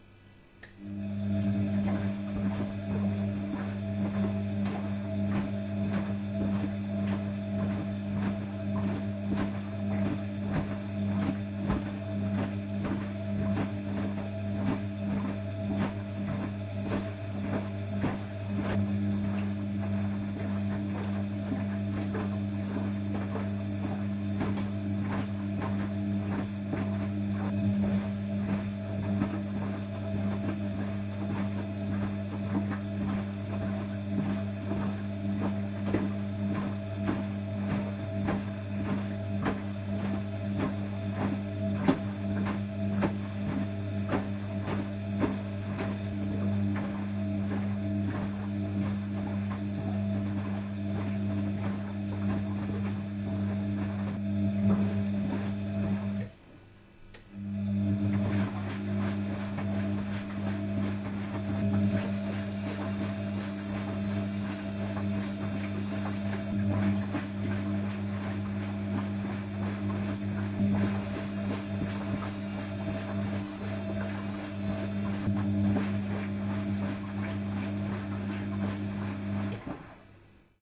Washing Machine
A Bosch WFD 2060 to be precise, wash cycle only for now...